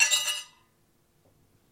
the sound of cutlery on dishes.